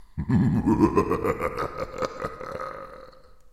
A sinister male laugh, recorded using a Blue Snowball microphone and the Linux version of Audacity.